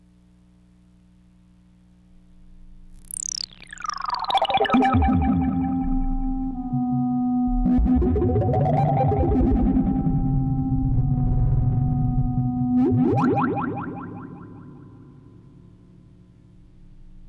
sound fx
sounds that were created during a mixing session. I cut out my favorite samples.
filter, echo, delay, sweep, effect